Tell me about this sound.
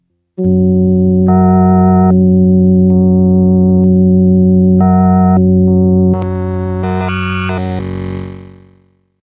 Yamaha PSS-370 - Sounds Row 3 - 13
Recordings of a Yamaha PSS-370 keyboard with built-in FM-synthesizer
FM-synthesizer, Keyboard, PSS-370, Yamaha